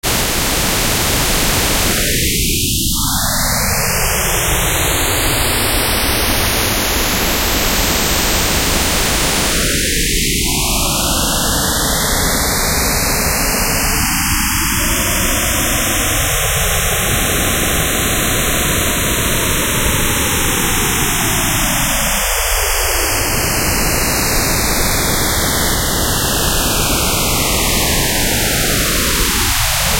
I took a random image I made of a triple spiral and ran it through the VirtualANS. This could be used for some crazy ambient sounds in a game.